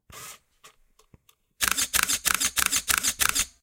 A stereo recording of a DSLR camera (Olympus E-410) focusing and shooting in sequential mode. Rode NT4 > FEL battery pre amp > Zoom H2 line in